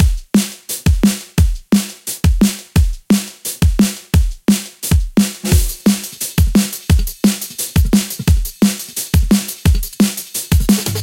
Spyre Break 27
acoustic, break, breakbeat, dnb, drum-and-bass, layered